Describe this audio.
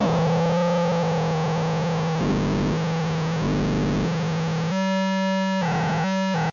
circuit bending fm radio
circuit, radio, fm, bending